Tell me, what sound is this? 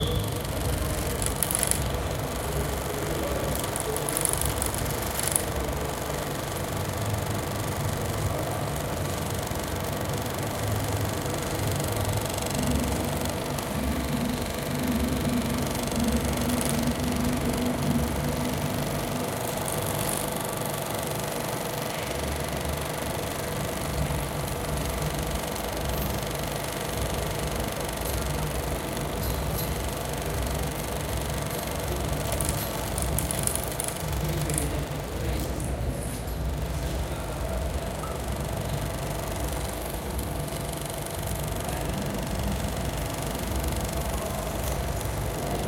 a super 8 camera is playing a film on the berlin atonal festival. nice ambient in the old kraftwerk. big hall - industrial
i used this sound in this track